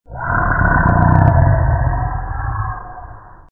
Dinosaur Growl
dinosaur, jurassic, monster, growl, roar, rumble